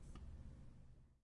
Release 01-16bit
piano, ambience, pedal, hammer, keys, pedal-press, bench, piano-bench, noise, background, creaks, stereo
ambience, background, bench, creaks, hammer, keys, noise, pedal, pedal-press, piano, piano-bench, stereo